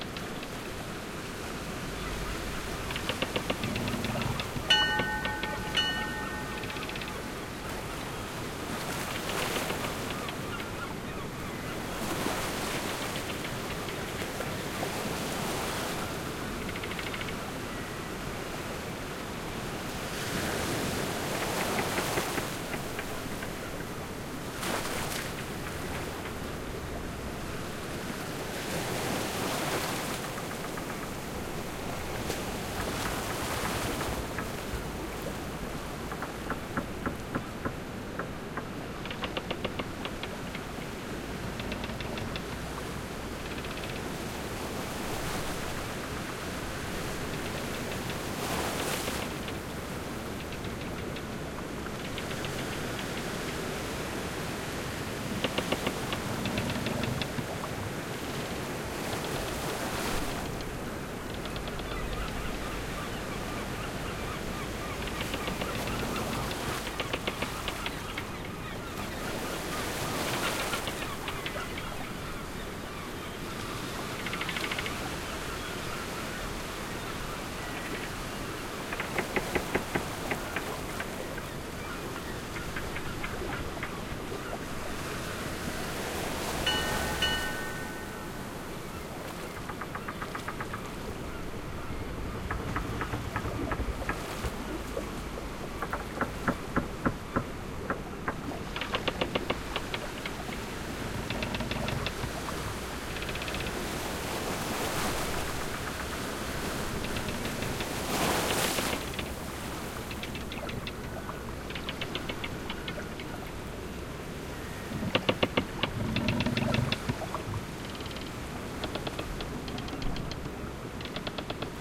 Pirate Ship at Bay
It wasn't great quality2. It didn't have stereo effects3. It was quite short (only 10 seconds of actual audio)Despite this, it was a great sample and I knew I could fix it up a bit. A higher quality, longer, and fully loopable remix using only a few components of the original. Enjoy, comment and rate!